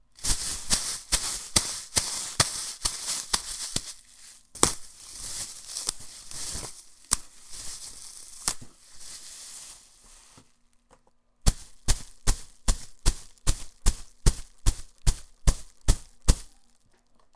Plastic Bag Sound Effects
Here is a recording of someone crumbling, tearing, and stomping on a plastic bag. You can use it for any video, podcast, or song and it would be nice if you gave credit to me (although it is not required).
bag, crumble, Plastic-bag, rip, ripping, stomp, stomping, tear